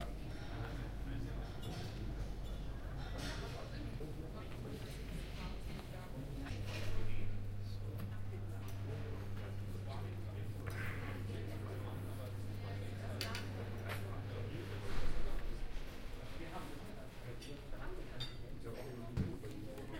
P5 ZKM cafeteria mix 13
ZKM Karlsruhe Indoor Bistro
cafe, cafeteria, cutlery, dishes, eating, indoor, lunch, people, restaurant, reverberant, talking, ZKM